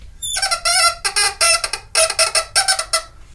Squeaking noise from marker on whiteboard